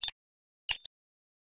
UIClick Button press.Click With High Tone 1 x2 EM
Button click sounds.
UI, beep, beeping, bleep, button, cinematic, click, computer, digital, effect, effects, enter, film, future, fx, game, input, interface, machine, movie, press, sci-fi, sfx, signal, sound, sound-design, sounddesign, tap, typing, user-interface